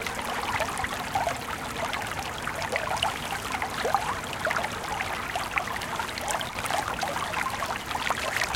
Rather large creek babbling and bubbling. Recorded with Zoom H4N and edited in Adobe Audition.